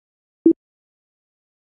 GUI Sound Effects